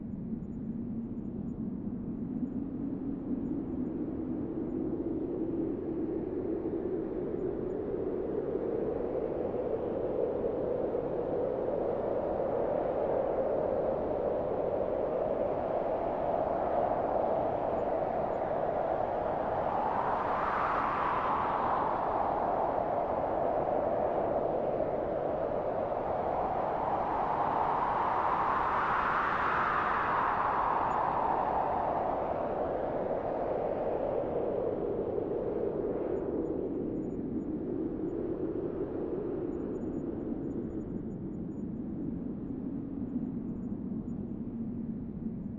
Monologue Wind
A seamless, endless loop of soothing pine forest wind created using my Korg Monologue synthesizer with echo and reverb effects. -DLG
Synth, Ambient, Synthesizer, Atmosphere, Soothing, Free, Loop, Wind, Ambience, Endless, Ambiance, Seamless, Noise, Trees, Monologue, Forest, Pine